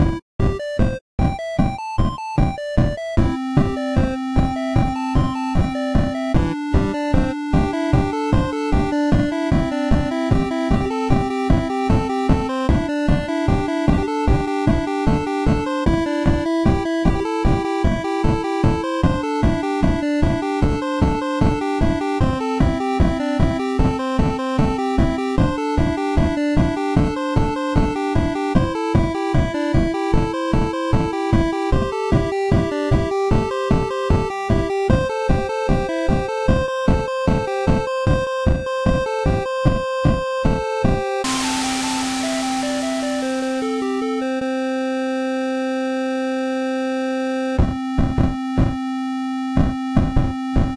Pixel Song #18
Happy, Music, Pixel